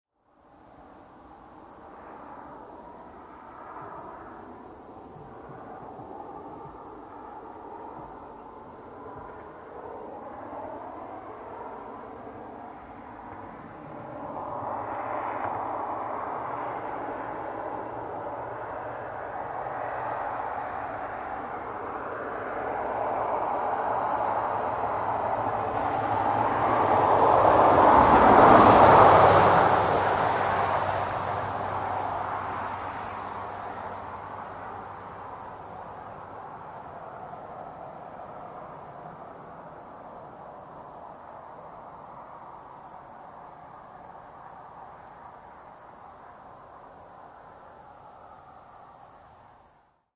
0019 Car driveby RL
Car driveby on highway right to left.